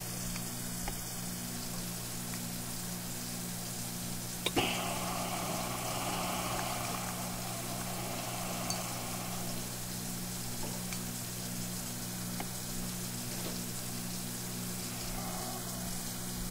coffe maker edited
Coffee from a coffee maker dripping into a ceramic mug
drip, mug